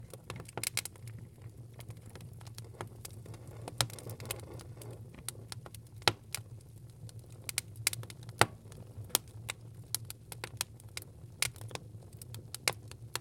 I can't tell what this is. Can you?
The fire is burning brightly... the wood is popping, the sparks a-twinkling, and thankfully my field-recorder isn't melting... yet. :D This recording is of a fire in a woodstove uh... yeah... not much else to say about fire - it's hot stuff!